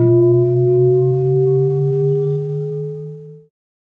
Modified bowl bell sound. pitch shifted up over the length of the tone.
ascending-sound, bells, bell-sequence, bowl-bells, sonic-phrase, temple-bells